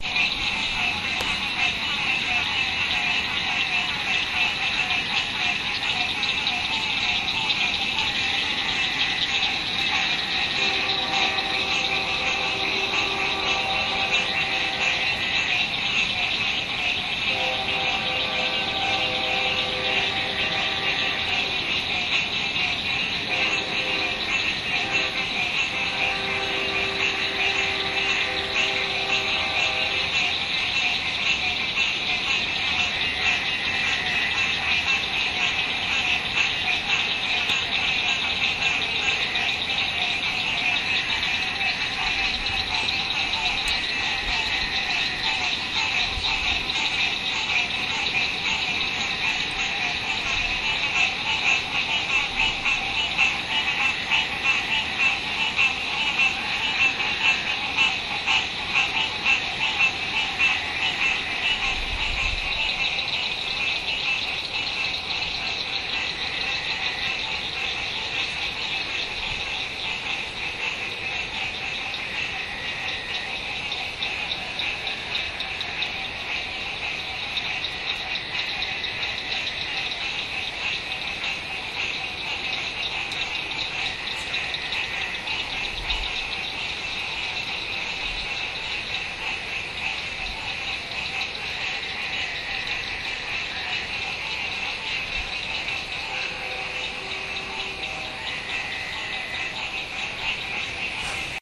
frogs croaking in my pond in Arkansas.
frog, frogs